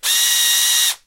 Quick usage of a cordless power drill. Recorded on a Zoom H5.